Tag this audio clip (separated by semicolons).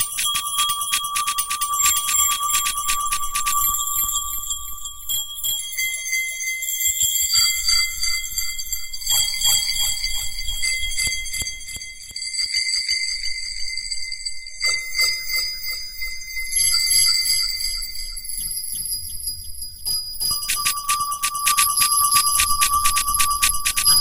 bell; texture; glass; percussion; hit; atmosphere